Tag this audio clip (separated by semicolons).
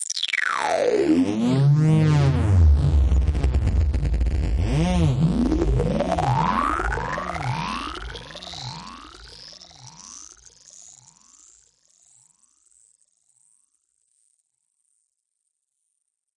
weird; soundeffect; sfx; synth; fx; freaky; acid; sweep; sound-design; digital; future; electronic; sci-fi